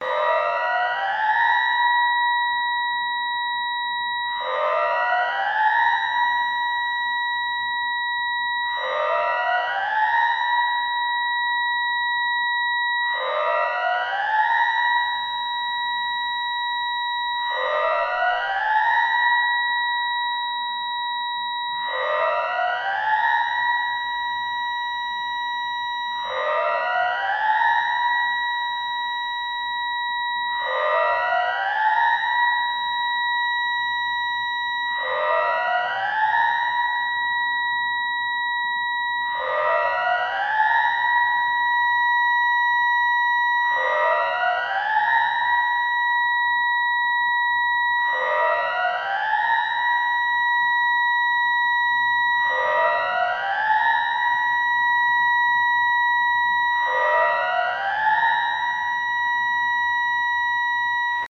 Futuristic Alarm3
Have you ever gone looking for that perfect siren? That one that will certainly spell doom for the characters in your movie. Only to find nothing to your taste? Well have no fear! Because the Doomsday Sirens Pack is here!
Created using several sound clips, and edited using Mixcraft 5. Created on 9/10/16
Siren, Alarm, Alert, Emergency